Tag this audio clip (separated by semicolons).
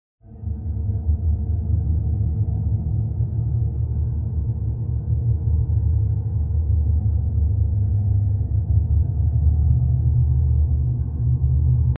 ANGRY; ATMOSPHERE; EVIL; FEAR; GHOST; HAUNTING; HORROR; SOUNDTRACK; SUSPENSE; TERROR